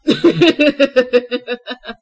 real laugh taken from narration screw ups